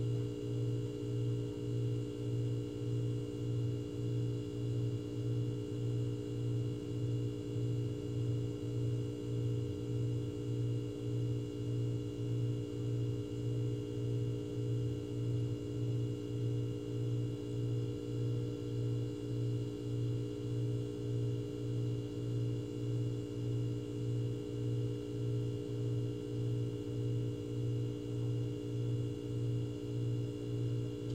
fridge noise, ruido del refrigerador o nevera